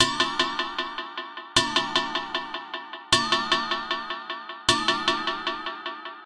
the effected sound of tapping the metal bowl of kitchen scales